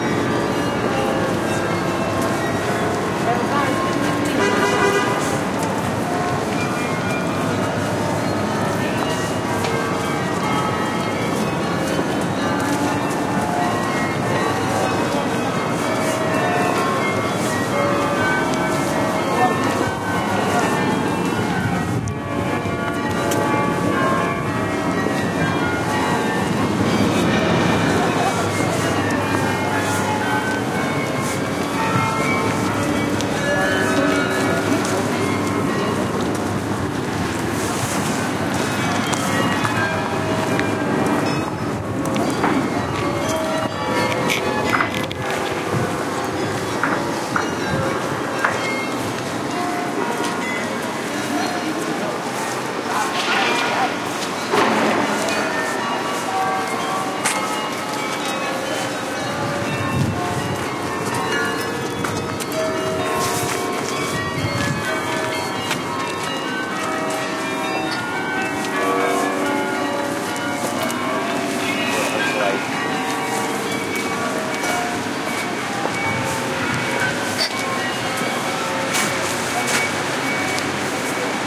20100402.Gent.Street.02
clock bells in downtown Gent (Belgium), with noise of construction, voices, and some wind noise. Olympus LS10 internal mics